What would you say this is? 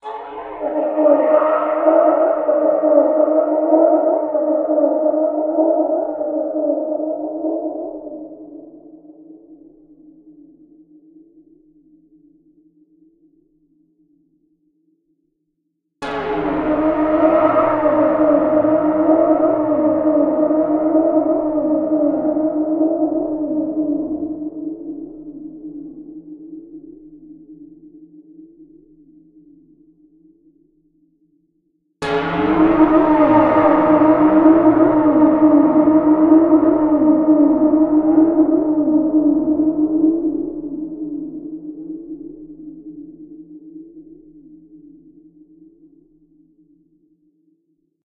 THE DARK FUTURE
Dark Suspenseful Sci-Fi Sounds
Just send me a link of your work :)
Sci, Horror, Free, Scifi, Cinematic, Suspense, Dark, Futuristic, Ambient, Film, Drone, Atmosphere, Suspenseful, Mood, Movie, fi, Future